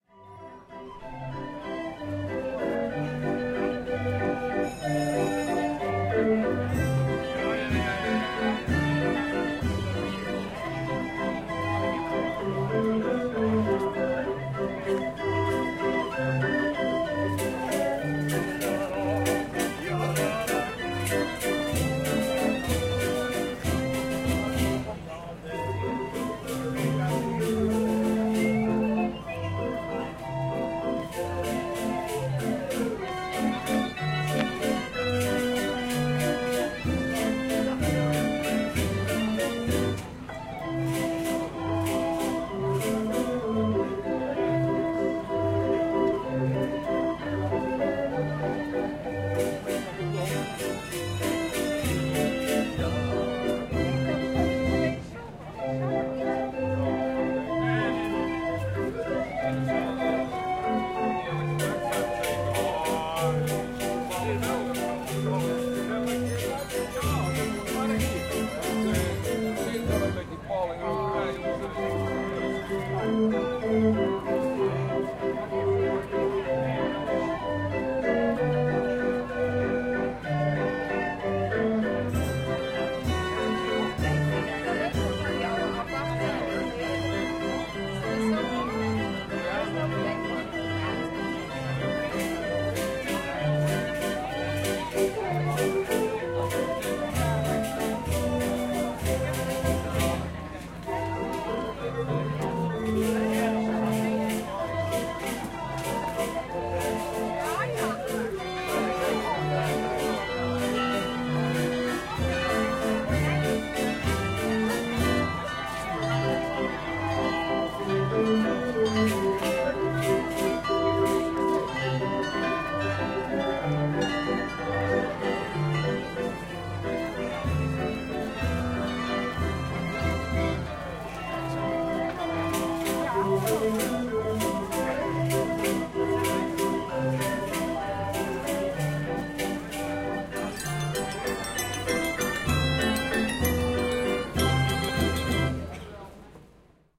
Street organ playing an old french popular tune on a handycraft market in Veere, Netherlands. Zoom H4n